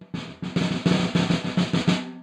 Snare roll, completely unprocessed. Recorded with one dynamic mike over the snare, using 5A sticks.